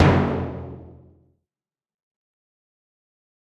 Dark Timpani 5
A dark Timpani with a lot of low-end No.5. Have fun!